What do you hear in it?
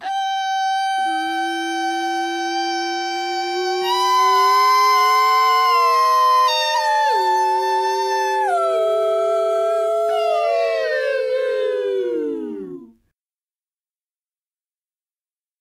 screaming voices weirdIII
Three voices (2 males and 1 female) screaming weird.
666moviescreams, Cridant, Estranyes, o, Surrealist, Surrealista